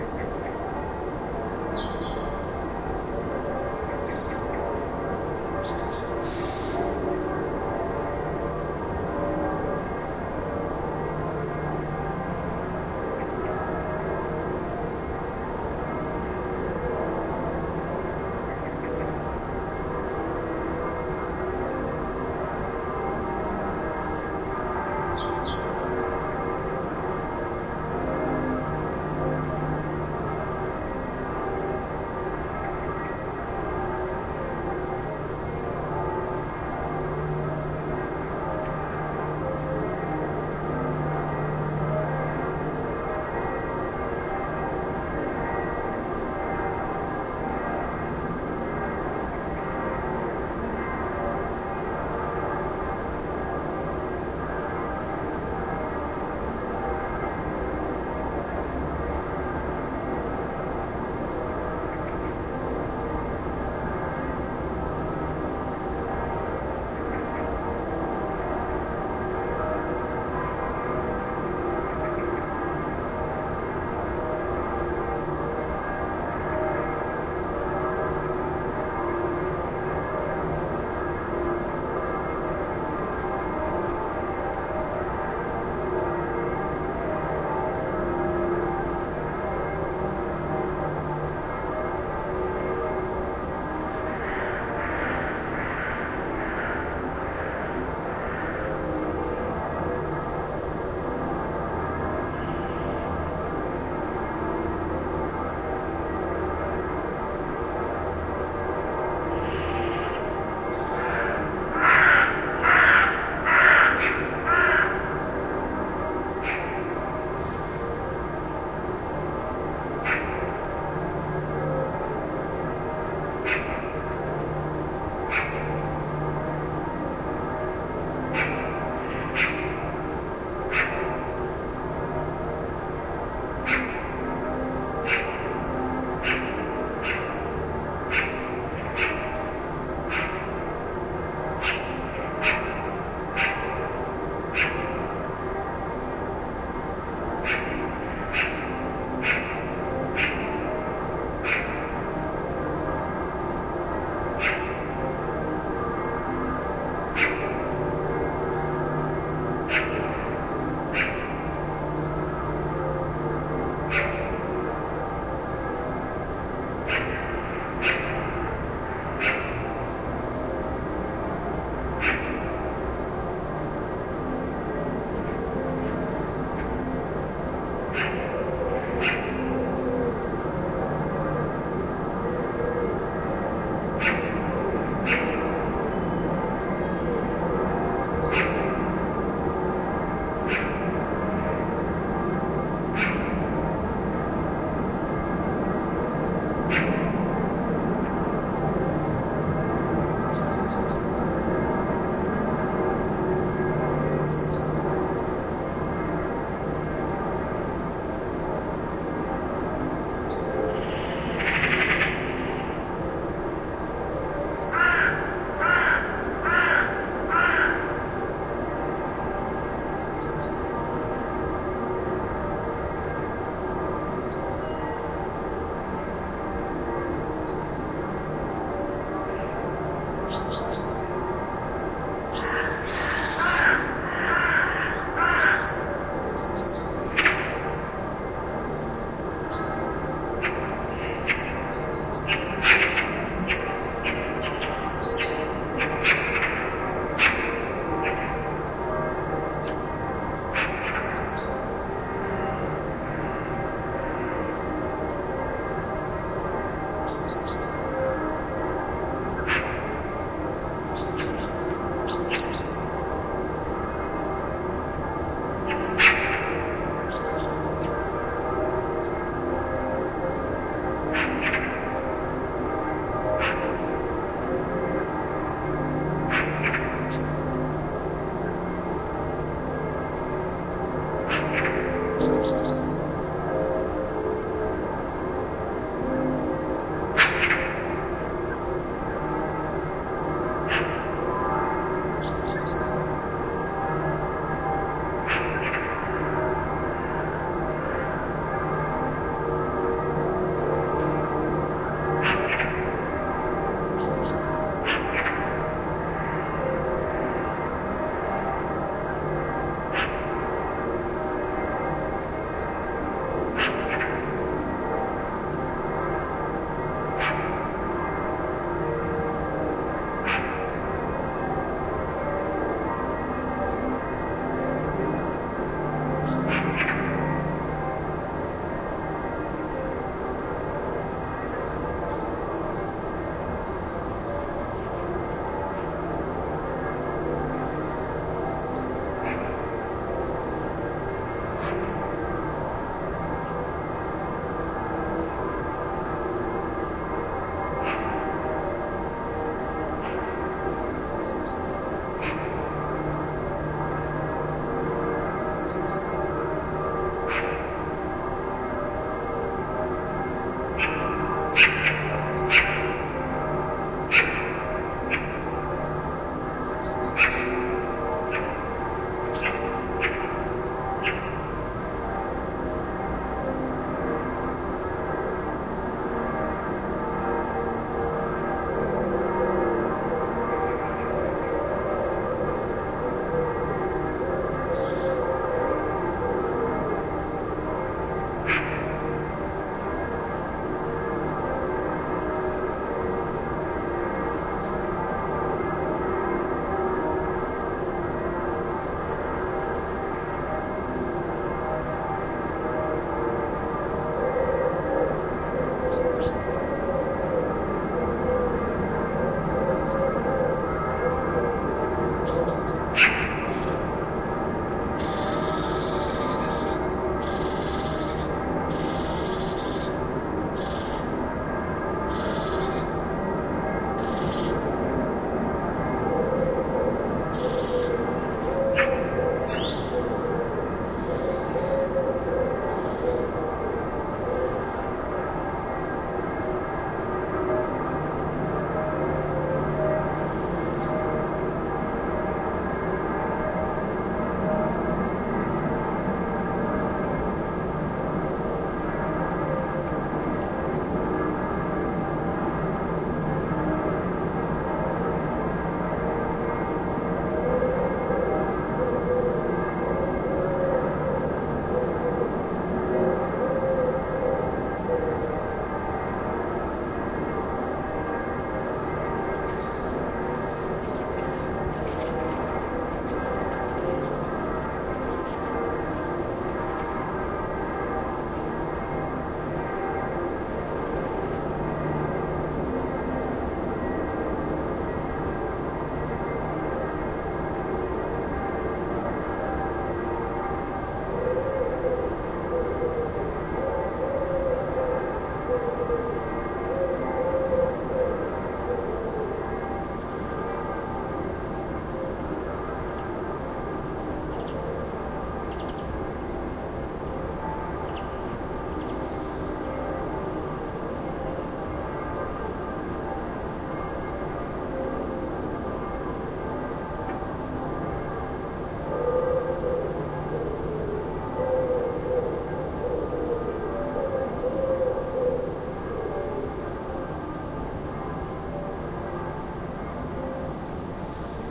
Sunday morning in town in the winter. One can hear birds and some churchbells. iRiver IHP 120 and the Soundman OKM II microphones with the A 3 adapter.
backyard, churchbells, field-recording